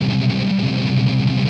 Recording of muted strumming on power chord C. On a les paul set to bridge pickup in drop D tuneing. With intended distortion. Recorded with Edirol DA2496 with Hi-z input.